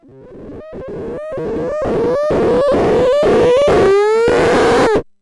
make noise 0-coast sound
creepy, cry, fx, horror, scary, screak, shriek, spooky, squeal, strange, terror, weird